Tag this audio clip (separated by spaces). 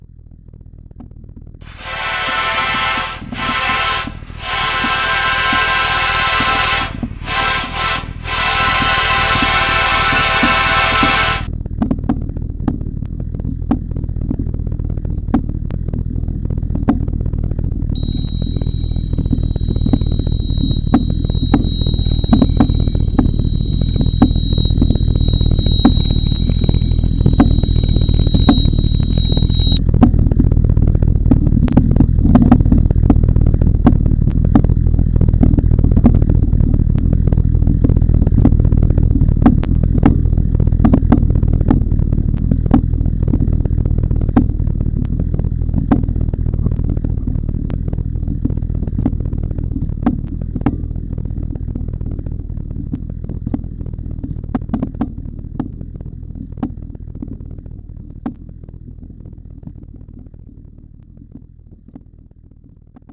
diesel rail-way locomotive